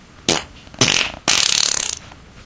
fart poot gas flatulence flatulation explosion noise weird beat aliens snore laser space
poot, flatulation, noise, space, snore, explosion